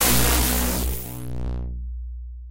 FM Crash Cymbal 3
Using AudioSauna's FM synth, an emulation of the Yamaha DX21, I have created a complete/near-complete percussion kit which naturally sounds completely unrealistic. This is one of those, a crash cymbal, the third I created.
crash, synth, percussion, cymbal, FM